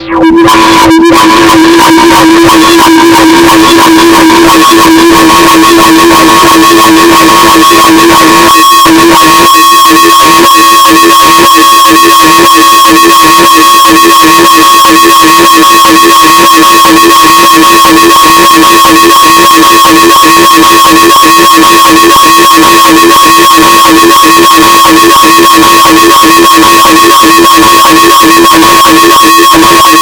unalive serum
weird, insane, loud, crazy, migraine-inducing, loop, space, horror